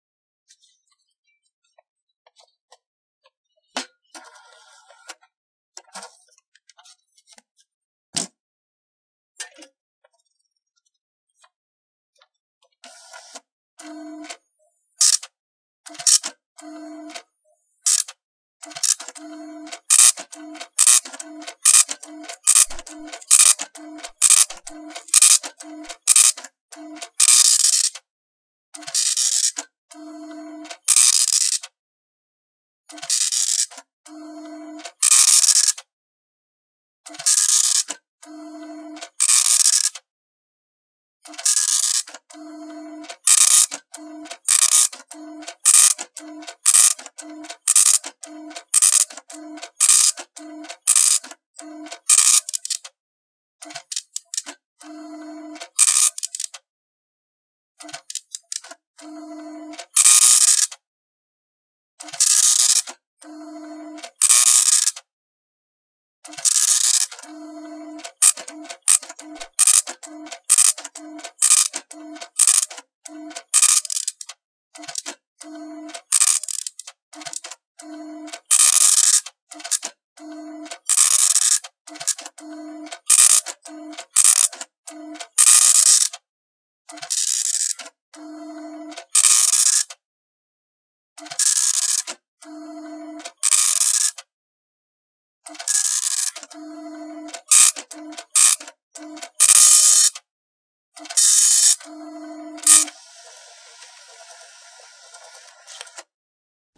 Dot Matrix Impact Printer KX-P1080 on Paper
Mono recording of dot matrix impact printer kx-p1080 printing on paper, includes manual feeding of paper and paper eject. Microphone placed in between print head and driving servo.
Recording quality is subnormal (distant, high noise) due to inappropriate microphone used. There is some clipping when the print head prints due to the large volume difference between the print head and servo; attempts to lower microphone gain to eliminate clipping caused servo to be almost inaudible.